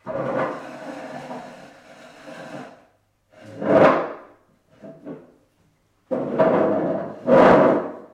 dragging wooden chair on tiled floor
wood, dragging, chair, tiles